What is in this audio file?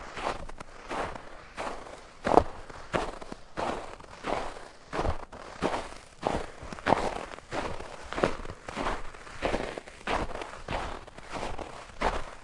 walking in snow 1
Walking in a couple inches of snow that covers gravel.
foley, footsteps, gravel, ice, snow, step, walk, walking